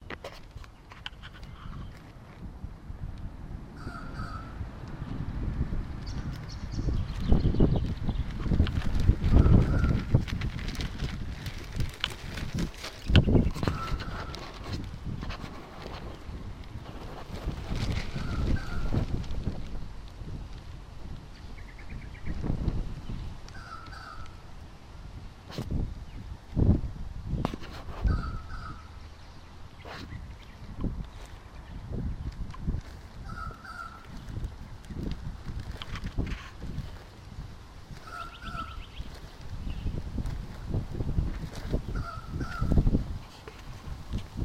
A weird-sounding squawking bird by the bank of Lily Creek, Cairns, 1 Oct 2018.
birdsong, stream, tropical